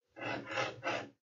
33-Rasguños-consolidated
Dog scratching wood
Dog, Scratch, Wood